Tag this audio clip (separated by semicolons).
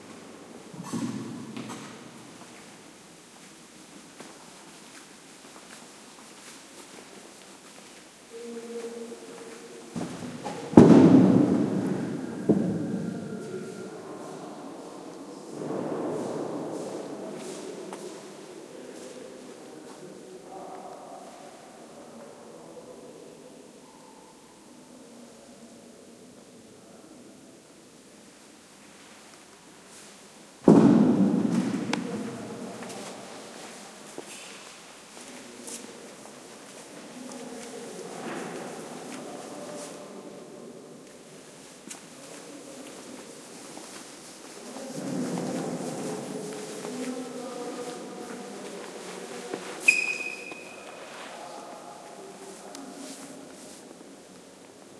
zamora; field-recording; echoes; cathedral